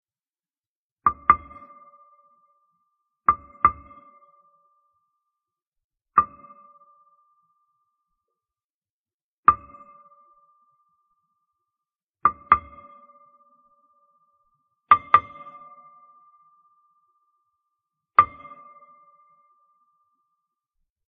Series of knocks on glass computer screen. Could be used to simulate knocking on screen from inside or outside computer or television screen. Cleaned up to remove ambient noise. Clean knocks.